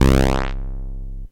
Razor Bass
bass sound nice for fast riffs. sound created on my Roland Juno-106
fast, bass, dance, techno, hard